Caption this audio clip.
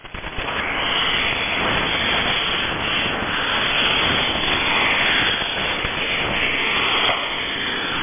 Stactic 003 Ethereal
Shortwave radio static. I believe I used a narrow bandwidht setting to produce this whistling/howling radio static sound.
Recorded from the Twente University online radio receiver.
online-radio-tuner, ethereal, whistle, short-wave, radio, static, howl, hiss, noise, narrow-bandwidth, AM, tuning, Twente-University, shortwave